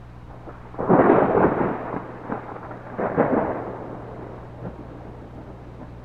Even more thunder I recorded from a window.
I Recorded it with an optimus tape deck and an old microphone (The tape I recorded it on was a maxell UR), I then used audacity and the same tape deck to convert it to digital.
If you use it please tell me what you did with it, I would love to know.
lightning, nature, storm, thunder, thunder-storm, thunderstorm, weather